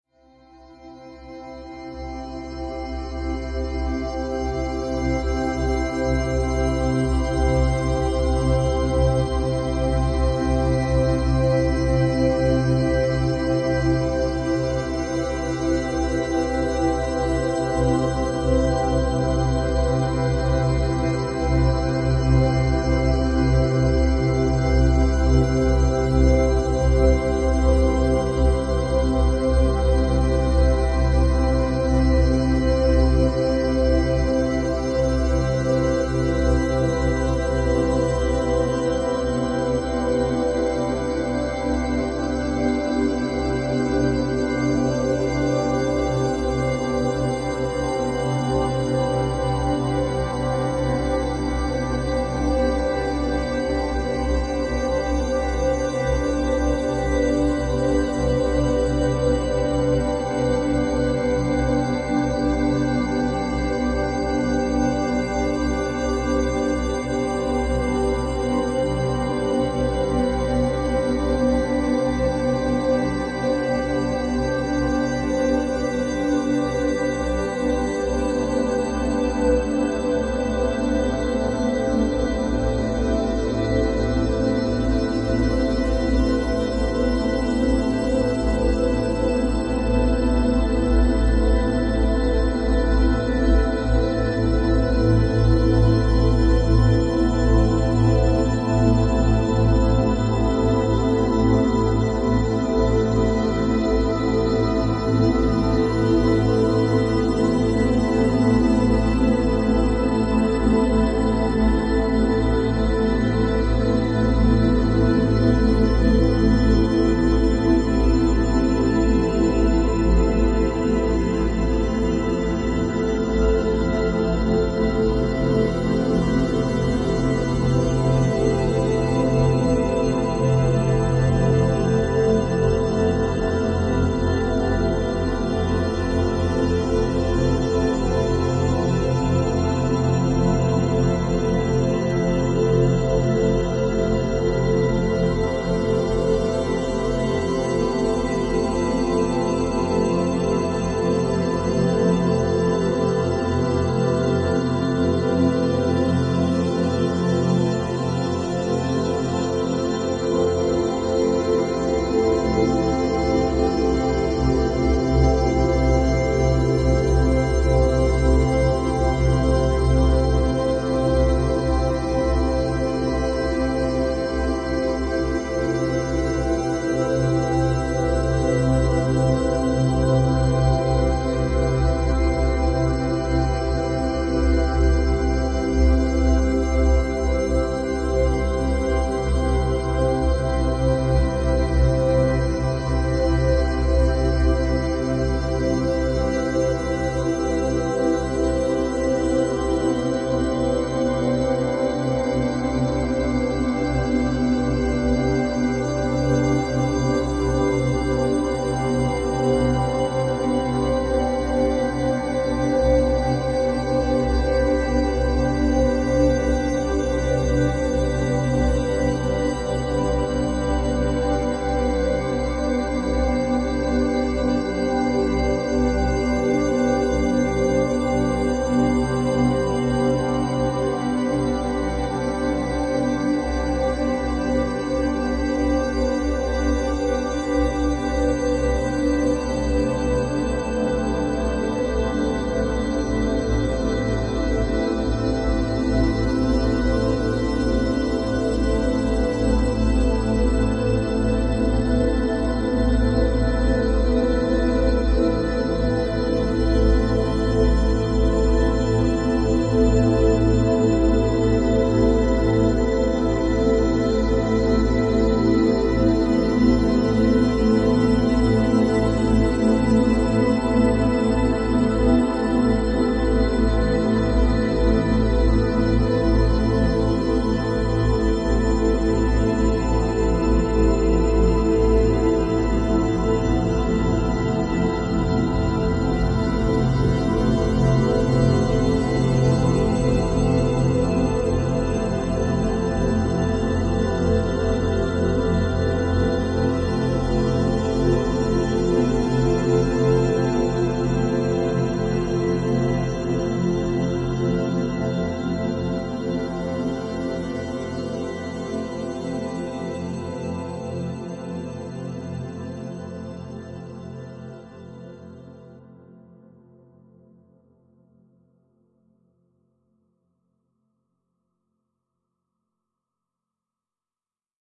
Ambience, Peaceful Synth
Then I added some extra reverb in Audacity and this is the result. I'll never have a use for it, so perhaps someone else will - it is rather pleasant for background noise.
An example of how you might credit is by putting this in the description/credits:
Originally edited using "Paul's Extreme Sound Stretch" Software and Audacity on 26th August 2016.
ambiance, ambience, atmosphere, paulstretch, peaceful, soundscape, synth, synthesiser, synthesizer